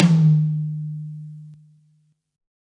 High Tom Of God Wet 015
set, tom, kit, pack, high, realistic, drum, drumset